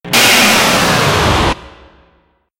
Massive Machine Shutdown
machine, machinery, mechanical, robot, sci-fi, sound-effect